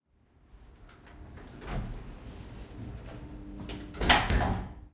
elevator door close 2b
The sound of a typical elevator door closing.
Recorded at a hotel in Surfer's Paradise with a Zoom H1.